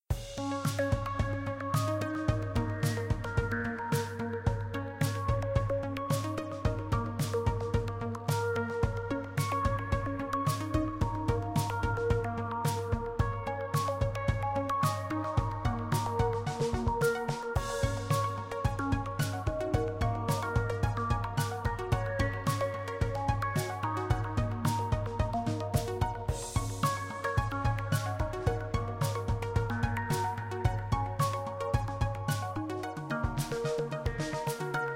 Technology - Upbeat Loop
A upbeat loop that is fun to listen to.
joy, happy, loop, techno